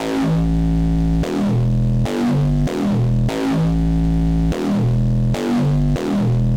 TS Puck 146bpm
Simple music loop for Hip Hop, House, Electronic music.
sound, loop, house-music, sample, electronic, music-loop, hip-hop, synth-loop, trap, trap-music